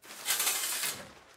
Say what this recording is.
Opening Shower Curtain